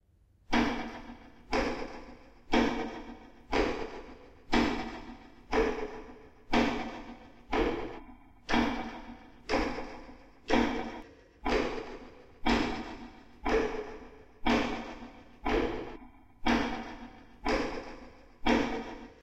clock ticking 01
thx to them and have fun!
ticking, clock, ticks